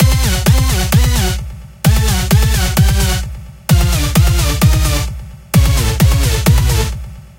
ldrave music theme